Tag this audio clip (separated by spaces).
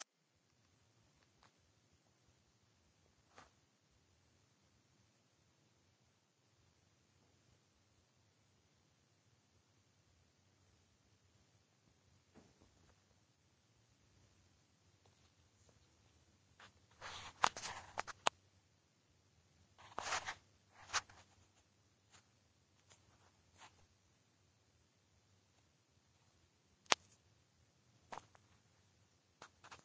ambient buzz